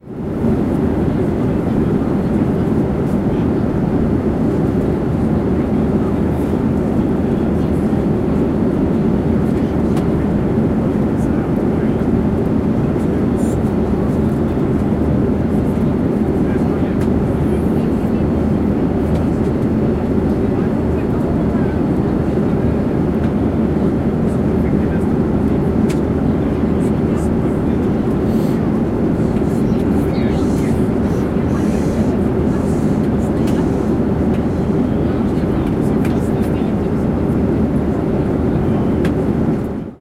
Flying High Up In The Sky, 04
Flying high up in the sky ! Flight attendant walks by with a carriage and serves.
This sound can for example be used in film scenes, games - you name it!
If you enjoyed the sound, please STAR, COMMENT, SPREAD THE WORD!🗣 It really helps!
sky, ambient, airplane, flying, flight-attendant, ambience, atmosphere, up, trip, high, travel, In, journey